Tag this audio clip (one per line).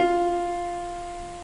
Fa
Notes